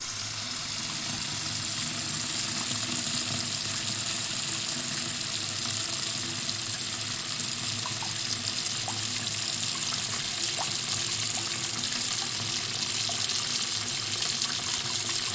Water into bucket
Close perspective of water being poured into bucket
dripping, perspective, water, Close